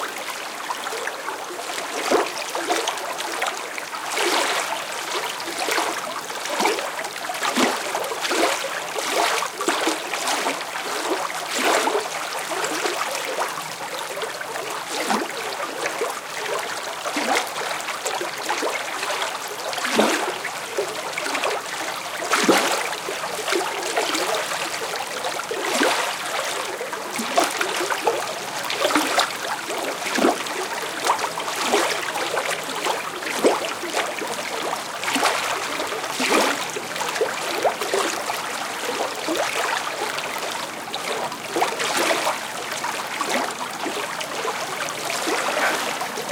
water river Lyna small stream with splashes stereoM10
This sound effect was recorded with high quality sound equipment and comes from a sound library called Water Flow which is pack of 90 high quality audio files with a total length of 188 minutes. In this library you'll find various ambients and sounds on the streams, brooks and rivers.
detailed, liquid, location, natural, nature, river, subtle